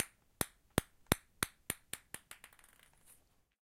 Balle Ping-Pong 4 (pan)
Ping Pong Ball
Ball, Ping, Pong